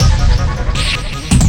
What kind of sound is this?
special fx audio
audio, special, fx